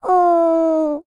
felplacerad atom v2
A pitched voice saying "ooooh" as if feeling sorry for someone.